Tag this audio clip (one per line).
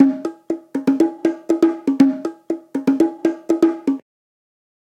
bongo
congatronics
samples
tribal
Unorthodox